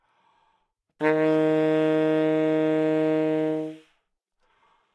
Sax Tenor - D#3 - bad-attack bad-timbre bad-richness
Part of the Good-sounds dataset of monophonic instrumental sounds.
instrument::sax_tenor
note::D#
octave::3
midi note::39
good-sounds-id::5243
Intentionally played as an example of bad-attack bad-timbre bad-richness
multisample, single-note, neumann-U87, Dsharp3, tenor, good-sounds, sax